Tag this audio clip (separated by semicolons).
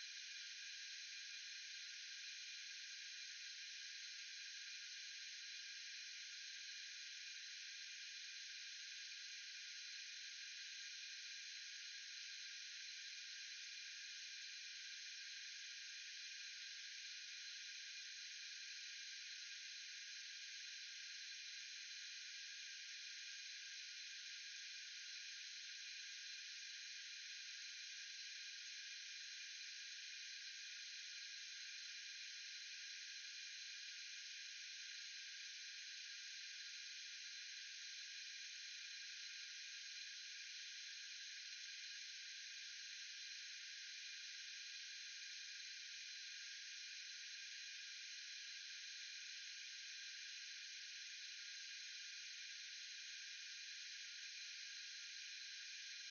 seamless; filter; hiss; ambient; gasleak; loop; gaspipe; ambience; noise